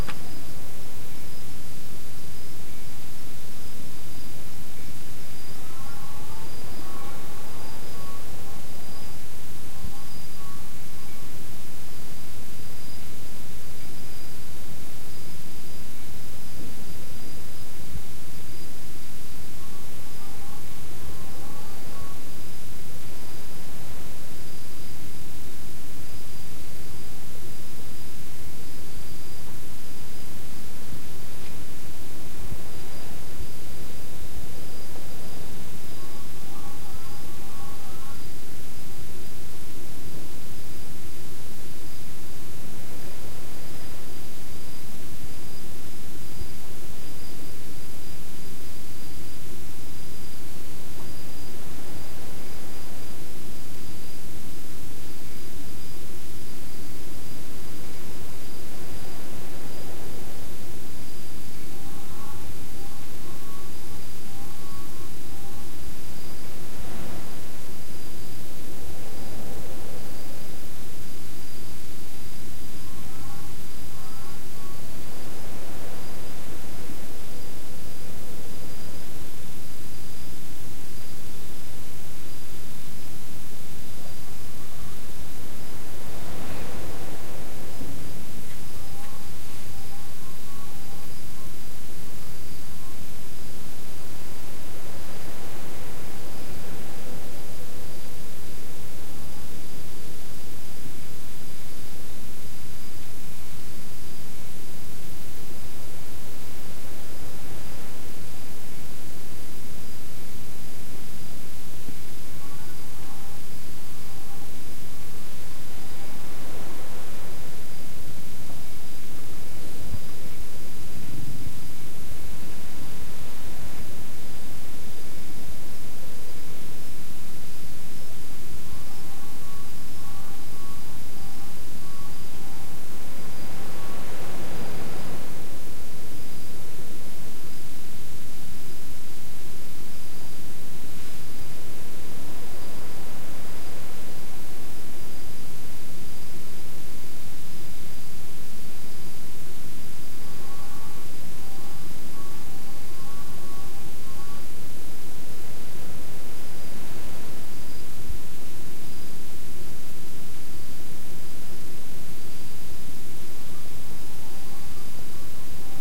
night long01
Recording taken in November 2011, in a inn in Ilha Grande, Rio de Janeiro, Brazil. Sounds of the night, recorded from the window of the room where I stayed. Crickets far away and other sounds that I couldn't identify. Recorded with a Zoom H4n portable recorder.
brazil, cricket, crickets, field-recording, ilha-grande, night, rio-de-janeiro